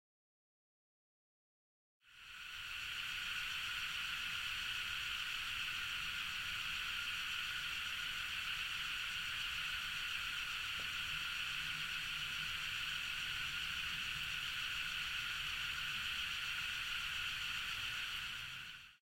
gas-cooker
The sound of a burning gas cooker.
burning, gas, Panska, cooker, Czech, fire, CZ